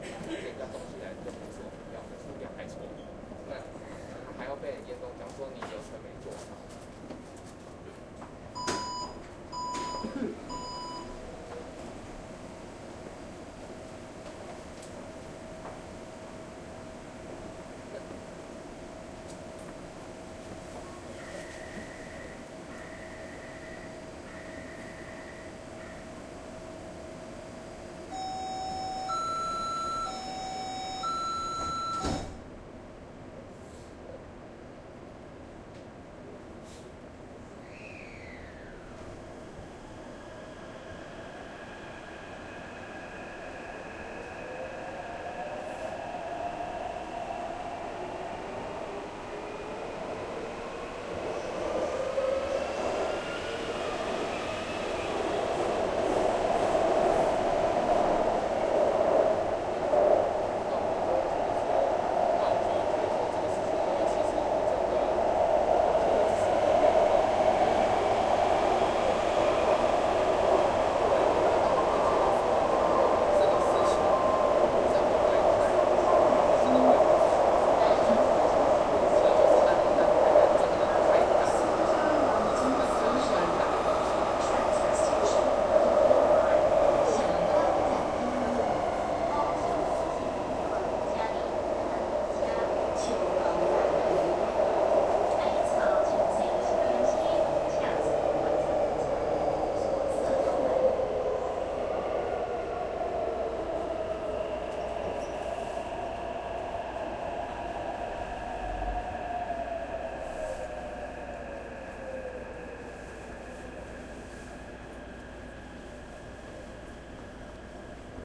Taipei MRT Songjiang Nanjing metro station
Taipei MRT field recording with ten-years old Zoom H2 handy recorder.
h2, zoom, mrt, field-recording, taipei